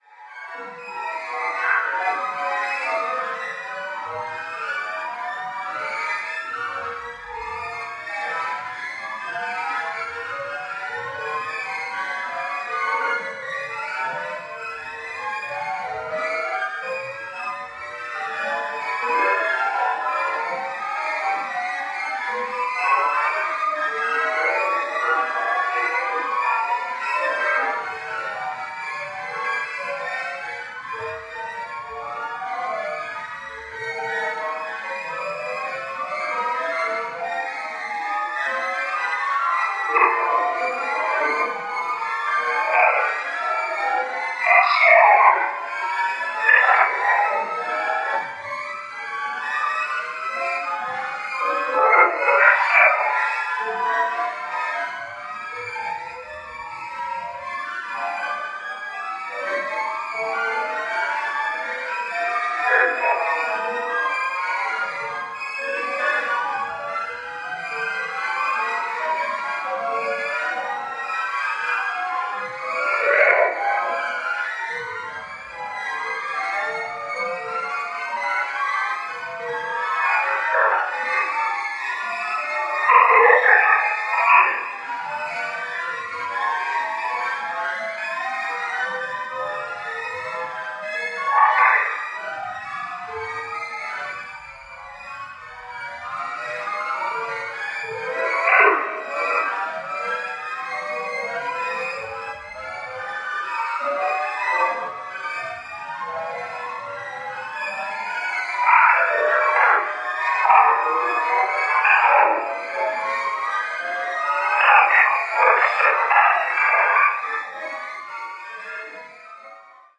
This sample is part of the "Space Machine" sample pack. 2 minutes of pure ambient deep space atmosphere. Metallic noises and whistles.